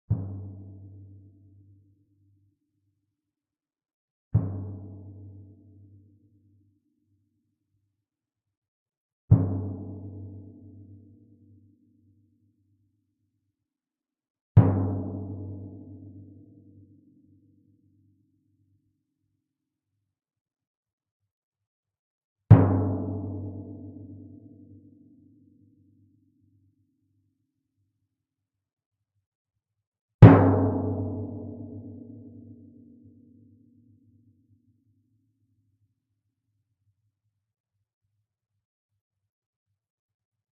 timpano, 71 cm diameter, tuned approximately to G.
played with a yarn mallet, about 1/4 of the distance from the center to the edge of the drum head (nearer the center).